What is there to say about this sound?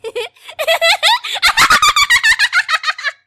WARNING: might be loud
a minkie laugh that builds in madness
crazy, minkie, obsidian, manic, laugh, pie